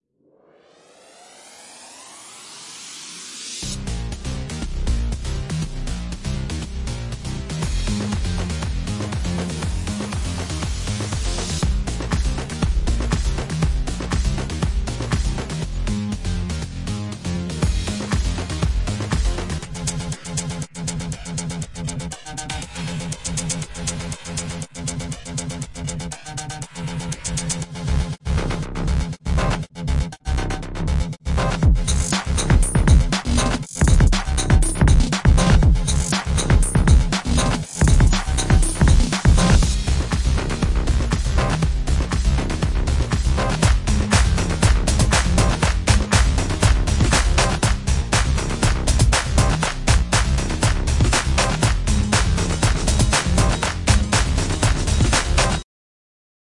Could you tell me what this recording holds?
dirty grind
Another "loop" i put together in Garageband. focused on an upbeat action packed theme. Used sort of heavy distorted drums for an echoing chamber fistfight sort of atmosphere.
Hope you like it!